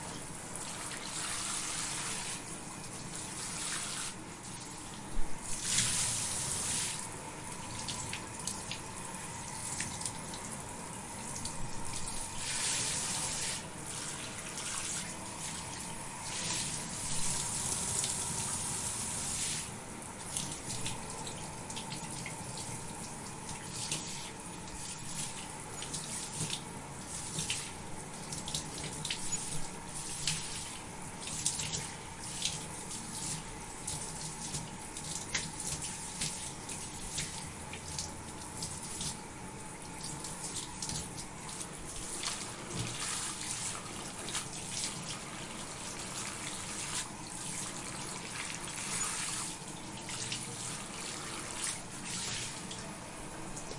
Taking Shower 1
A quick recording of a someone taking a shower for foley. Recorded on the zoom H5 stereo mic. I cleaned up the audio and it is ready to be mixed into your work! enjoy!
foley, sink, h5, stereo, denoised, zoom, zoom-h5, clean, high, water, bath, sound, washroom, shower, running-water, quality, edited, bathroom, field-recording